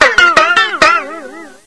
2 stringed gourd twang. Recorded as 22khz
One of the instruments as played by the FLOATING CONCRETE ORCHESTRA
detuned, gourd, handmade, invented-instrument, strings